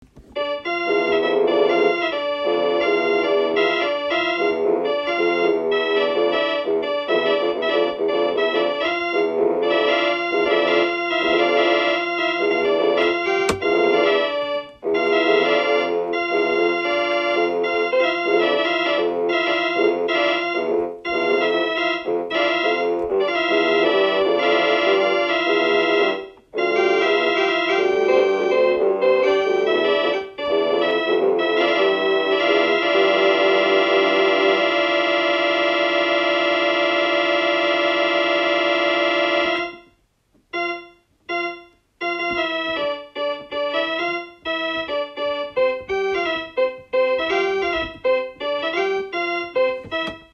Creepy walking music
I can assure you this is original because I don't know even know how to read or play music.
This is an original track that has been made for our upcoming film. I put my cheap keyboard on the organ setting and played with it until I heard something that almost sounded intentional. More importantly, if you do use it, I would love to see what you use it for it. Please drop a link :)
Cathedral, iphone, keyboard, Organ, Music